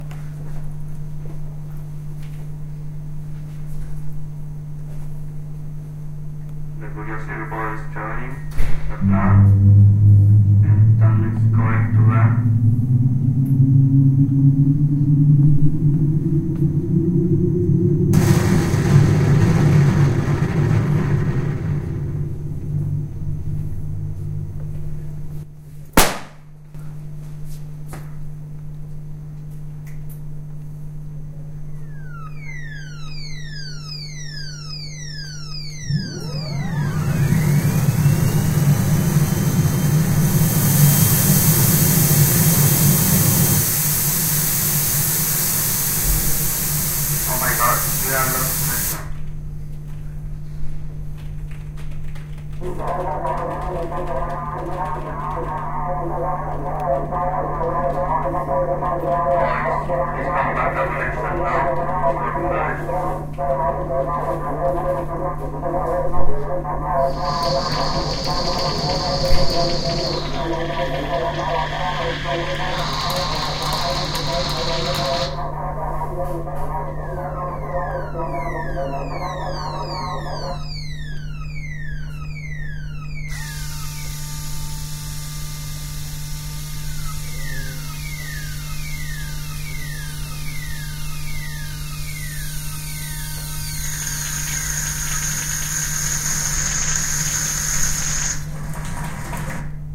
130213 INS Poblenou curiosity a mart
13-02-13 INS Poblenou
The facts line of our ficiton is:
Mars Curisosity moves quietly through the space
Sounds about the communication between Mars Curisosity and the Earth
Entry into Mars atmosphere
Silence: tension moment
Parachute opens
Alarm sound indicating the activity of the motor.
Deceleration motor sounds
Mars Curiosity lands in Mars
Loss of communication with Earth, technical problems with the keyboard
Recovery of communication and general celebration.
Alarm sound indicating robotic movement.
Use of the laser to take samples of the Martian surface.
curiosity, KiiCS, mars, mart, MTG, NASA, Phonos